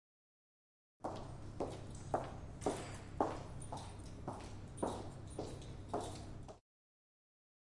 Security shoes steps
This sound shows a person, who is a security man, walking serenely on a hall.
It was recorded at Tallers building in Campus Poblenou (UPF).
campus-upf, Feet, Hall, Security, shoes, Steps, Tallers, UPF-CS14, Walking